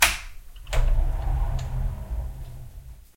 Slide door O
bath, close, closing, door, open, opening